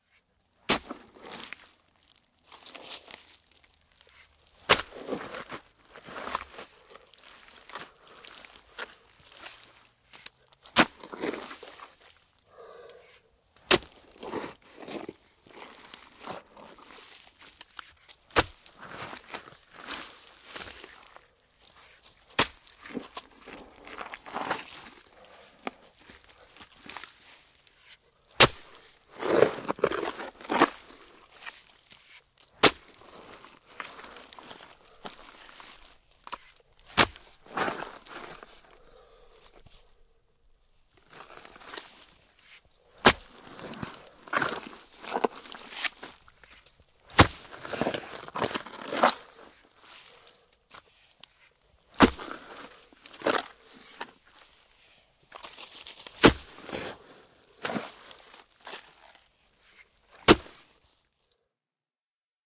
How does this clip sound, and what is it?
ZAPPA FANGO
rare sound of digging in the mud
hoe, farmers, digging, mud, muddy